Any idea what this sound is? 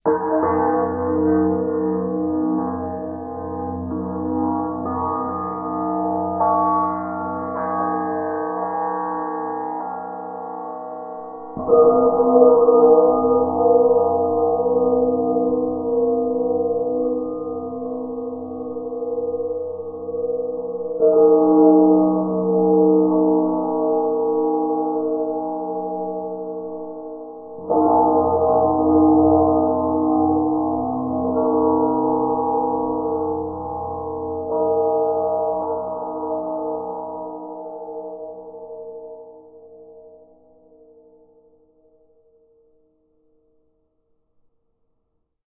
This is a compilation of a series of spanner drops slowed 16X. Given the original spanner was 25 cm, you can imagine this sound to be three 4 meter long spanners made into a wind-chime.